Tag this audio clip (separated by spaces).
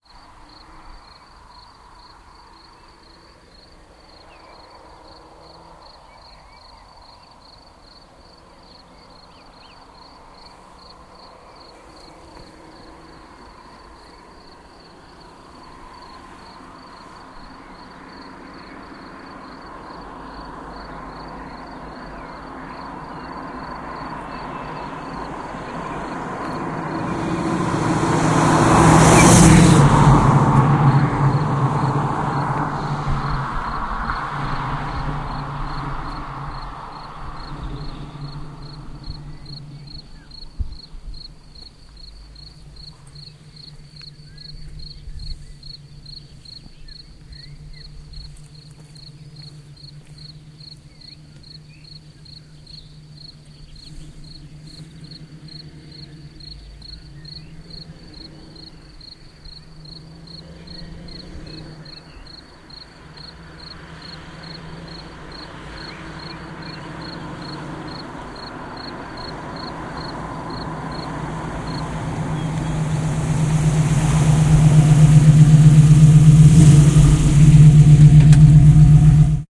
Sports-car Switch Car FX Mustang Motor